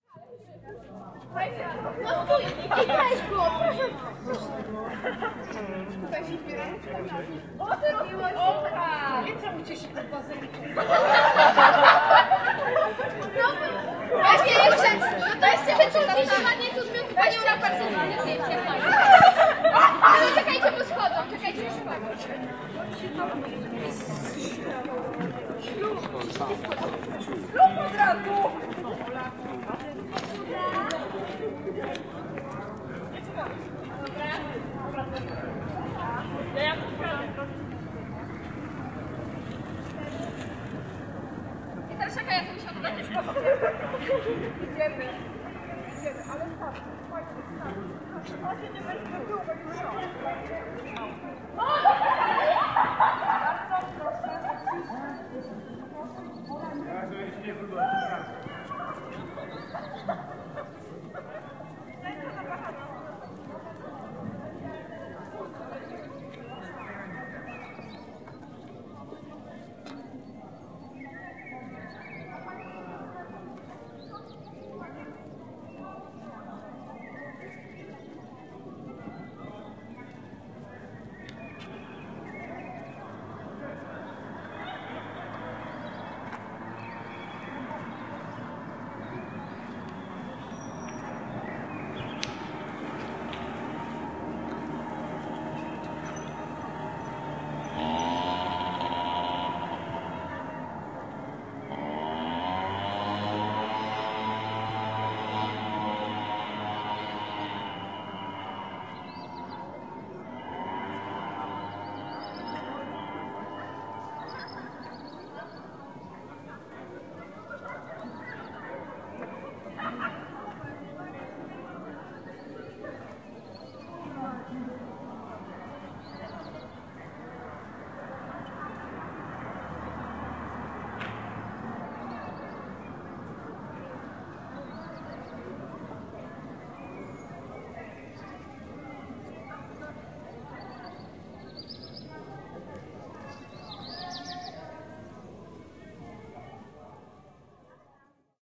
180509-in front of wambierzyce sanctuary 001
09.05.2018: around 14.00 p.m. In front of the Basilica of the Visitation (Polish:Sanktuarium Wambierzyckiej Królowej Rodzin) - a Baroque basilica minor located in Wambierzyce. Ambience. No processing. Recorder zoom h4n with internal mics.